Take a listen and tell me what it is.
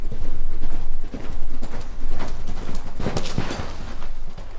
Horse Gallop & Stop In Dirt
The horse trainer had their horse gallop from a distance, then brake suddenly, when close to the mic.
brake, Gallop, Horse, stop